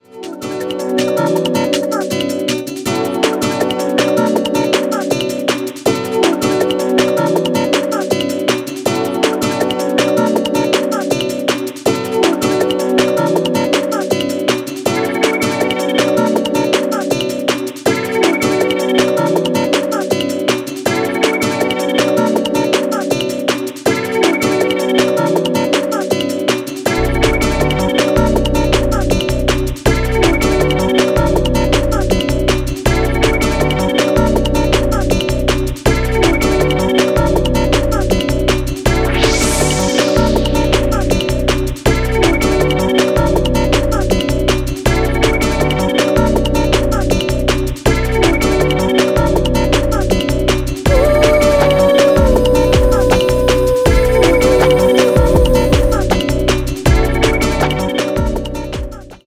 sample with A key compatible with F#, 160 BPM, beat loop and a little gain to 1.3dB
clean, electro, chords, drum, bass, guitar, synth, electronic, electric